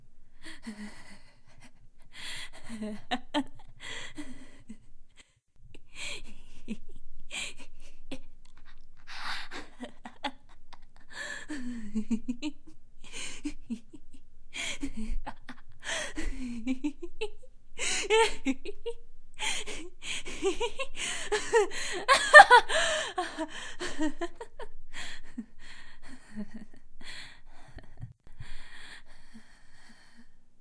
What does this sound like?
Me giggling insanely.